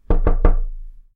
Knock knock knock
Knocking on a wooden surface
Knock, Wood, Wooden